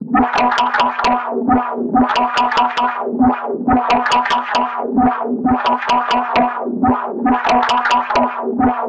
backspace beat
the backspace button on my work PC hit over & over again, then processed in Live